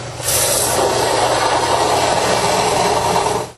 The disharmony of industrial-strength
sanitizer being sprayed into a sink.